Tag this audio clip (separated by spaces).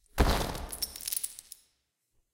debris magic